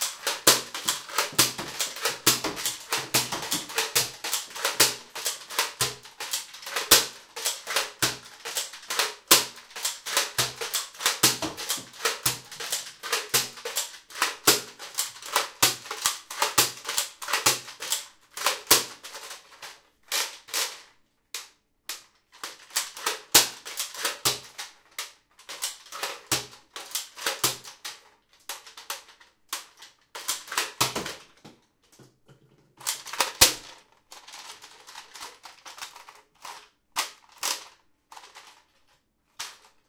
NERF Gun Toy
Shooting a nerf gun (Nerf N-Strike Maverick REV-6), most of the shots fail. Then spinning the plastic barrel.
Recorded with Zoom H2. Edited with Audacity.
playing, fail